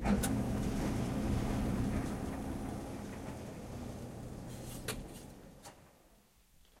Turning on a drying machine
mechanical, whir, machine, latch, buzz